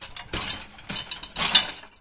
Tallrikar i diskmaskin
A sound of me putting some plates into the washingmachine.
plates, washingmachine